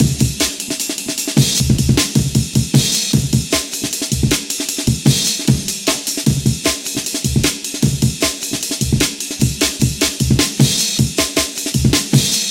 rushed mirror.L
amen loop with a lot of crashes just made it quick for a bassline as an example for someone....
amen, b, brother, chopped, cut, d, drum, drum-and-bass, drums, jungle, twisted, winstons